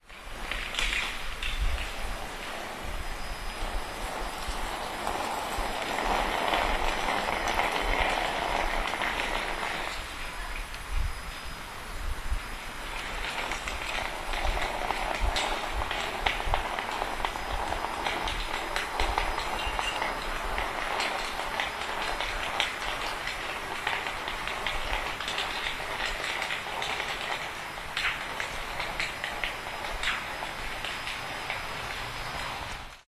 22.08.2010: about 22.10. crossroads of Czajcza and Powstancza streets in Poznan. the suitcase sound.